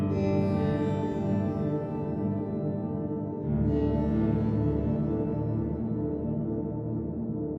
A short loop of guitar through a pitch shifter, a frequency shifter, and an echo. It should loop seamlessly but maybe it doesn't. There are more important things.
relaxing, atmosphere, echo, loop, ambient, guitar, shimmer